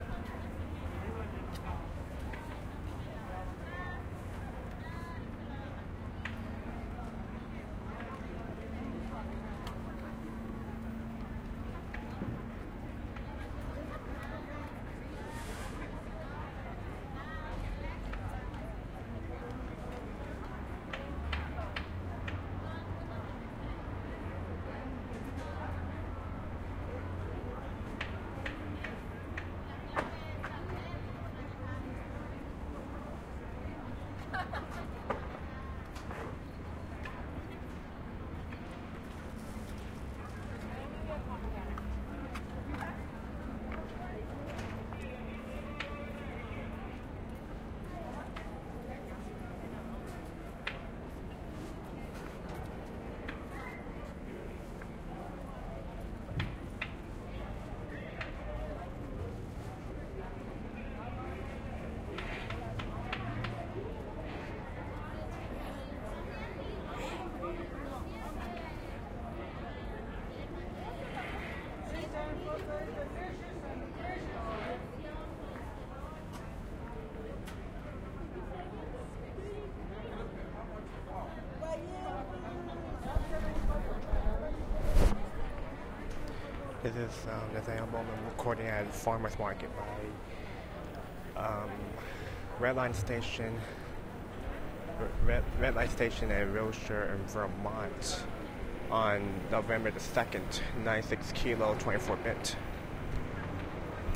Farmer's Market (With commentary)
People talking as I walk through a farmer's market in Los Angeles, near Koreatown.
ambience, city, field-recording, Market, people, talking